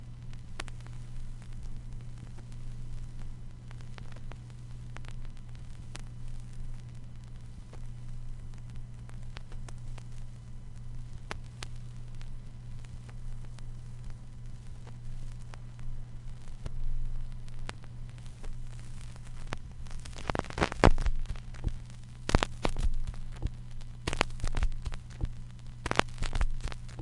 A collection of stereo recordings of various vintage vinyl records. Some are long looping sequences, some are a few samples long for impulse response reverb or cabinet emulators uses. Rendered directly to disk from turntable.
surface, album, record